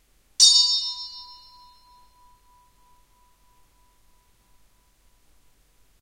This version is 10% slower than the original. Edited in Audacity 1.3.5 beta
bell, bing, brass, ding